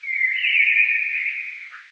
These are mostly blackbirds, recorded in the backyard of my house. EQed, Denoised and Amplified.